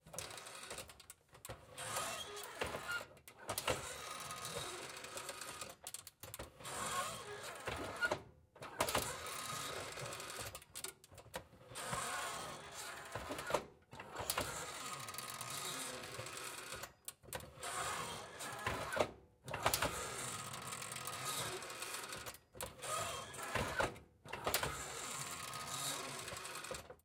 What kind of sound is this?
Old Electric Stove, Oven Door Hinge Creak 2
Sounds recorded from an old electric stove, metal hinges, door and switches.
cook, cooking, door, fx, house, household, kitchen, metal, oven, sfx, sound-effect, stove, switch